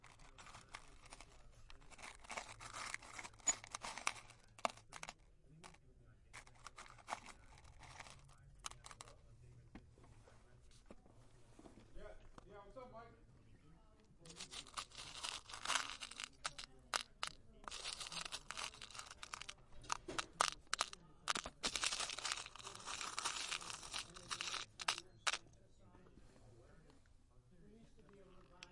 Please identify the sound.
fondling a lot of nuts and bolts and dropping them into their plastic box, part 2
one in a series of recordings taken at a hardware store in palo alto.